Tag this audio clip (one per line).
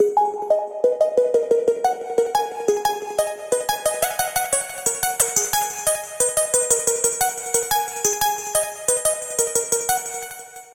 179BPM
Bass
Beat
DnB
Dream
Drum
DrumAndBass
DrumNBass
Drums
dvizion
Fast
Heavy
Lead
Loop
Melodic
Pad
Rythem
Synth
Vocal
Vocals